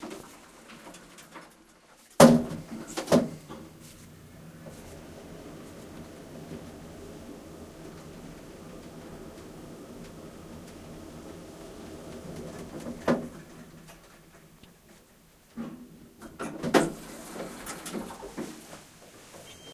Riga Latvia. Elevator in Grand Palace hotel
Elevator going up Grand Palace Hotel riga latvia
elevator, hotel, latvia